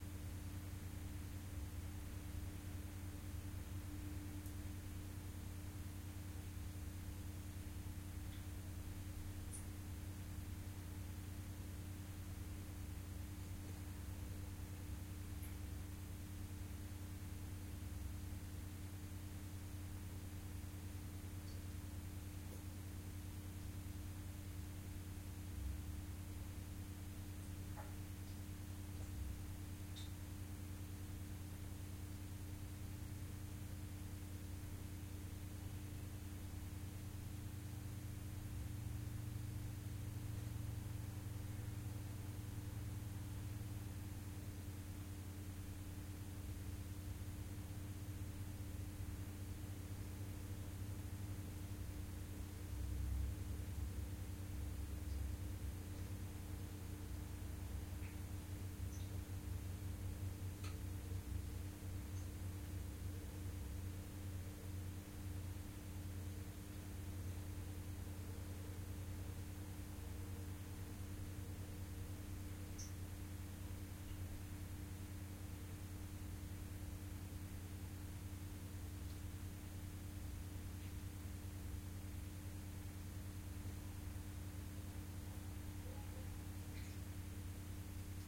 bathroom atmosphere
light, neon